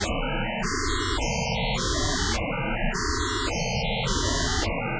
Sequences loops and melodic elements made with image synth.